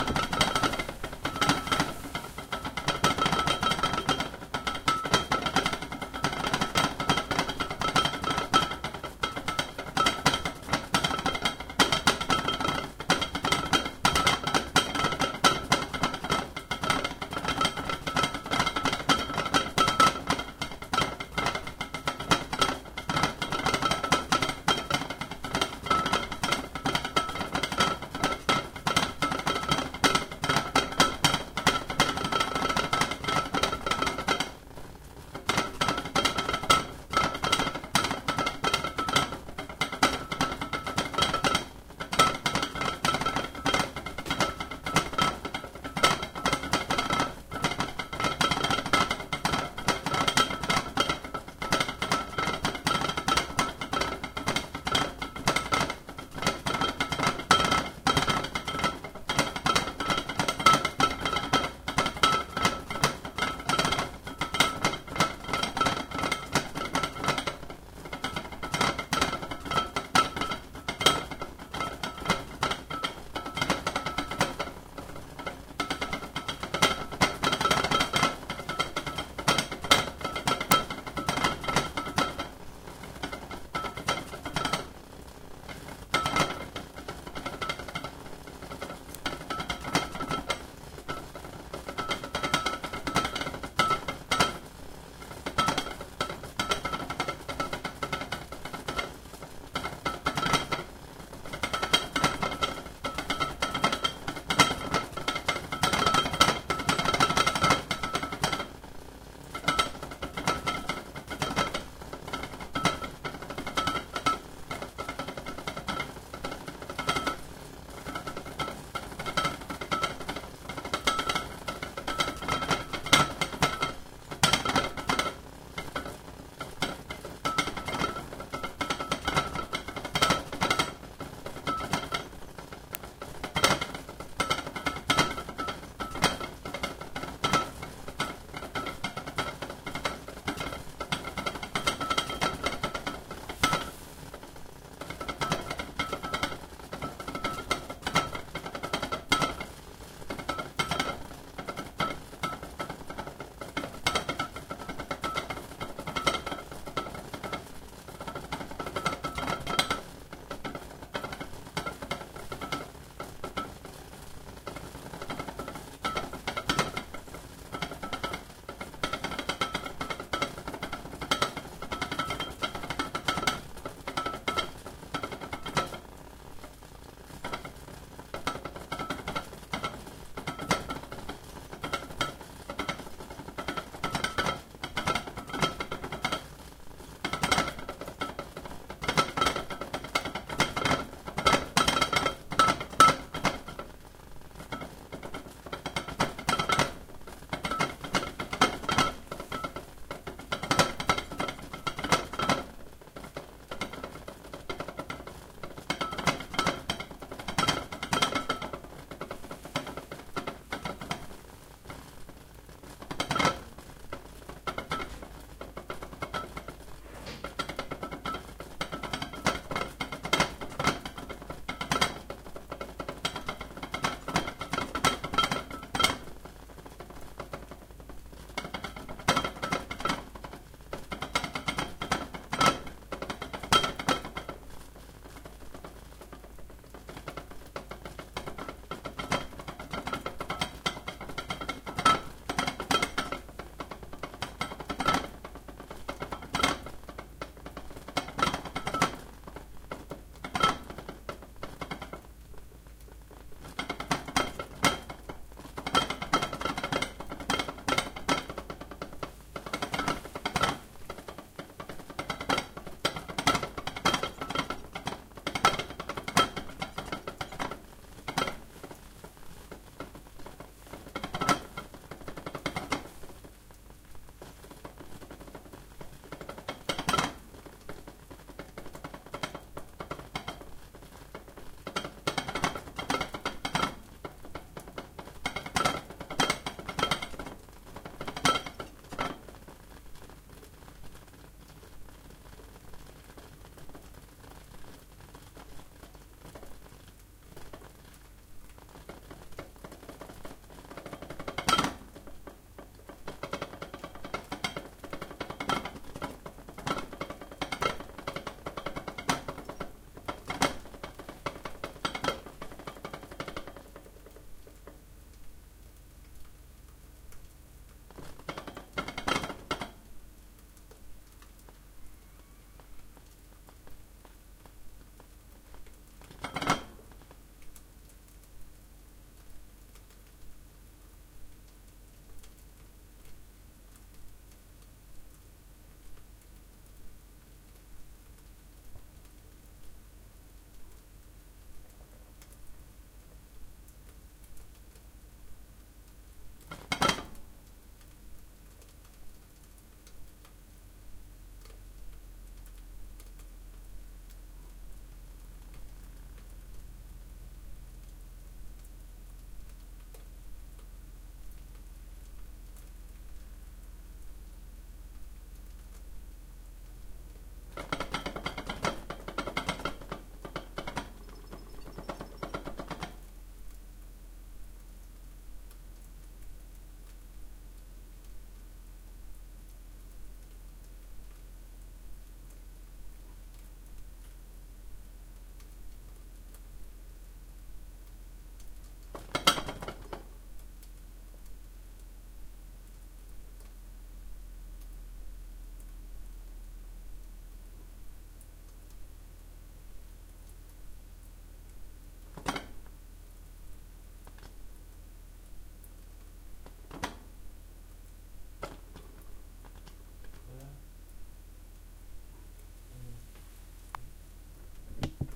Japanese Ceramic Rice Pot
A traditional ceramic Japanese rice pot cooking a fresh pot of short-grain white rice. Recorded in a home in Shinagawa, Tokyo in December 2013.
rice, hissing, boiling, pressure, cooker, bubbling, clattering, food, kitchen, wet, metal, Tokyo, traditional, bubble, boil, stove, cook, hot, kettle, cup, steam, Shinagawa, water, cooking, pot, Japan, froth, ceramic